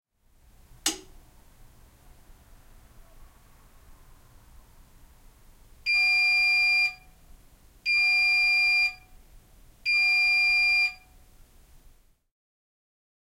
Washing Machine Finish Beep
Washing machine unlocks the door and beeps three times to indicare the end of the cycle.